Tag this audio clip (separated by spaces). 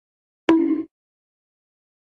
bang baseball bat bonk